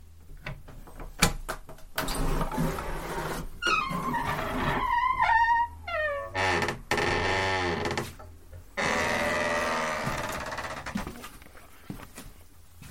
Opening an old creaky door in the attic of an old house somewhere in the countryside.
creaky, door, old, scary